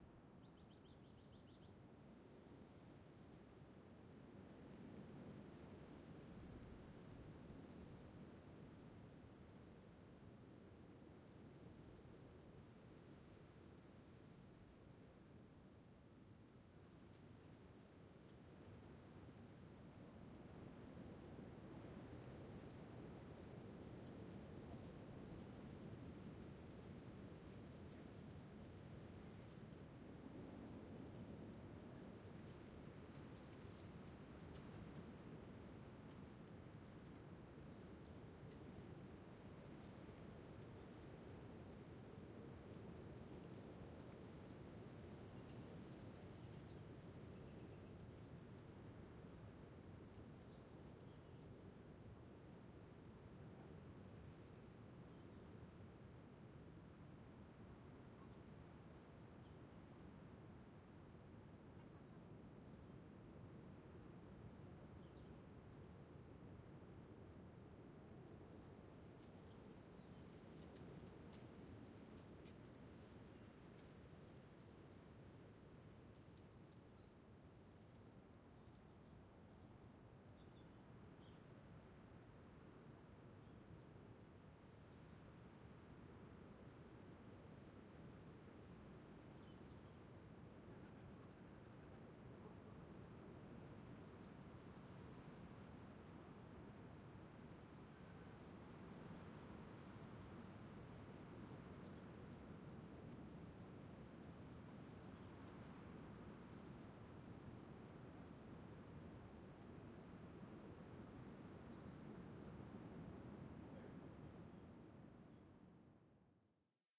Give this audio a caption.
Larun Mountains Low QuietWind
Vent doux sur les montagnes de La Rhune, pays Basque.
Quiet wind over Larun mountains, Basque country.
Recorded w/ Schoeps MSTC64 ORTF & Sound Devices 633
wind, montagnes, quiet, pais-basco, mountains, rhune, larun, euskadi, pays-basque, fied-recording